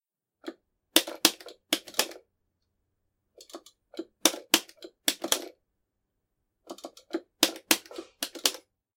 chun 1(HRK)
A soundclip I recorded of myself doing Chun-Li's BnB link combo (HRK version) on my arcade stick.
It helps when learning the precise timing of each button press if I ever forget it.
Chun, Chun-Li, Combo, Help, SFV